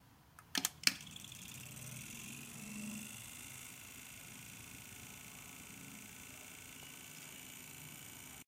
Broken Fan
Recorded in a medium sized room.
Button, OWI